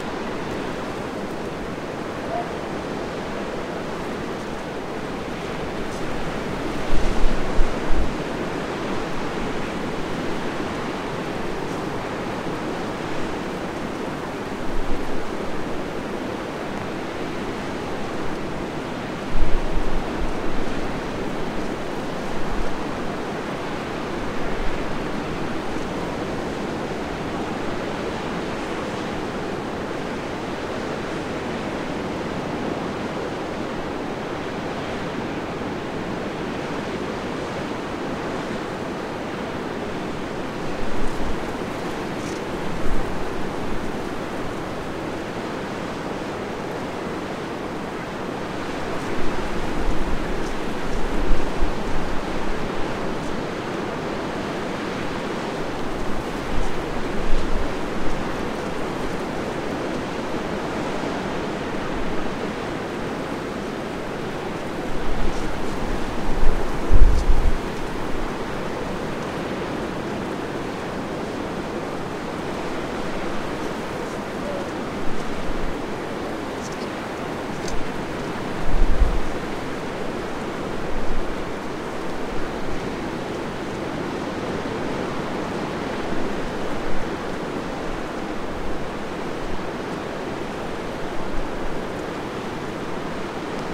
Summer Beach Storm
Ambient sound of waves on a beach during storm. August 2021. Recorded on Zoom Hn4 Pro with Rode Videomic microphone. Some wind noise despite dead cat.
Bournemouth, cliff, sea, storm, United-Kingdom, waves, wind